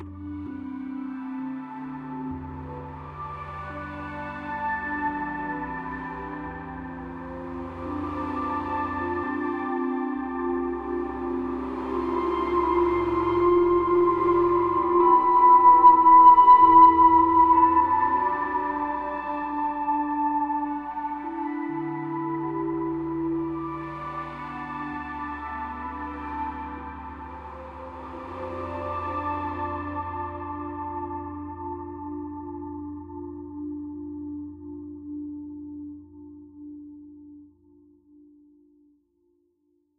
Beach relax in october
I made the score in Maestro.
SFX conversion Edited: Adobe + FXs + Mastered